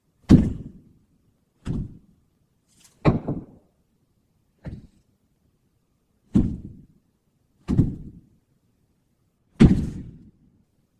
Heavy Impacts
Setting down a heavy jar on a coaster, processed.
fall, heavy, reverb, boom, low, impact, big, explosion, thump, thud